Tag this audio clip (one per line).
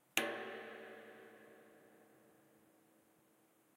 propane
tank
reverberation
field-recording
hit
wood
metallic